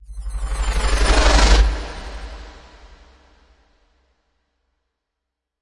Fly by sd
Short passing by, low, mid and hi components based on synth and recorded sounds,
scifi, shortpassingby, sounddesign